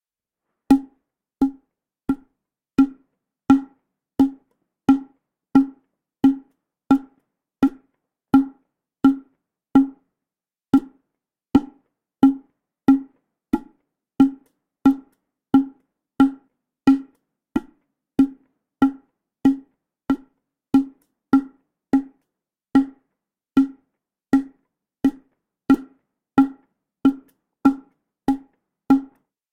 A mono recording of a cardboard tube being struck by hand at one end, the other end pointing towards the mic.